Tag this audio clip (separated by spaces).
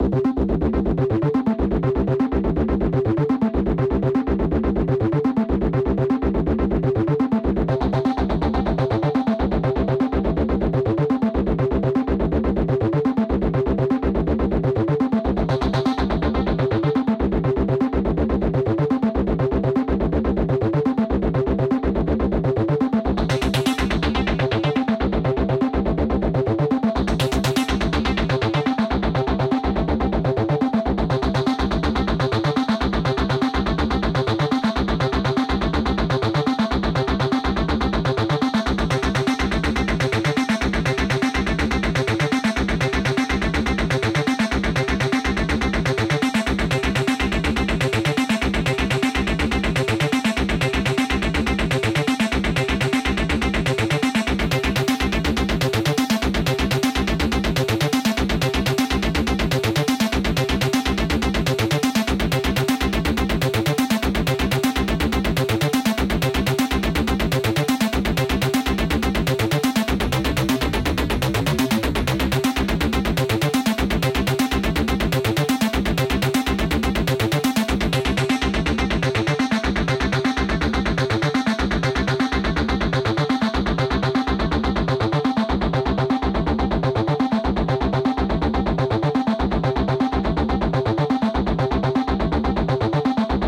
125 ableton ableton-live acid BPM dance loop software-synthesizer soup synth synthesizer synthetic techno